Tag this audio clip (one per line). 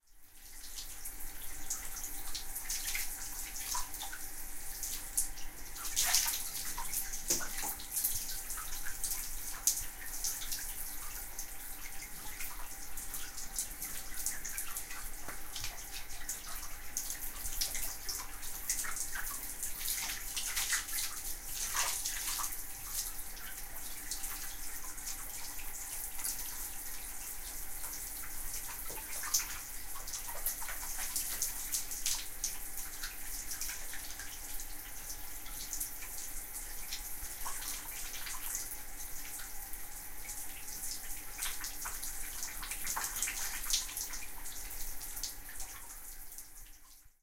quiet
washing
cleaning
shower
pooring
running-water
shampoo
bathroom
tranquil
bathtub
water
easy